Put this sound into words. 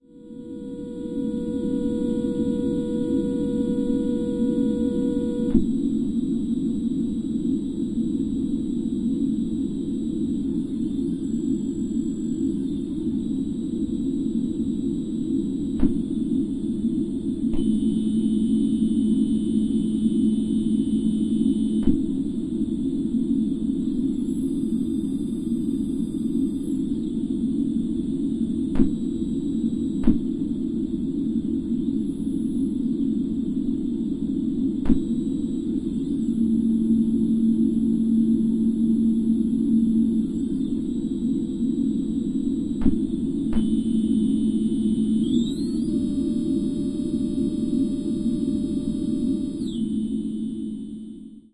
Noisy interior ambience of spacecraft. Made on an Alesis Micron.
spaceship, synthesizer